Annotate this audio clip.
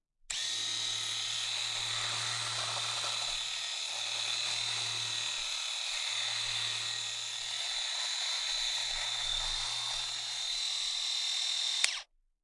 Electric razor 5 - normal mode on beard
A recording of an electric razor (see title for specific type of razor).
Recorded on july 19th 2018 with a RØDE NT2-A.
razorblade, shave, Razor, hygiene, shaver, beard, shaven, electricrazor, shaving, electric